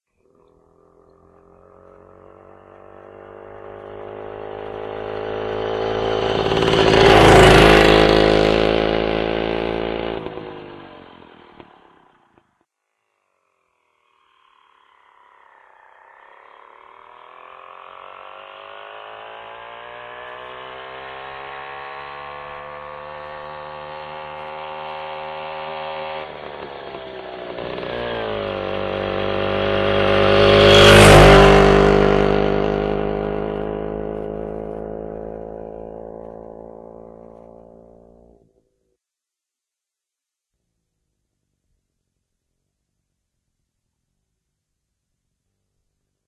Two recordings of a close pass of a 98cc vintage 1938 light motorbike.
The second pass features a shift of 1st to 2nd gear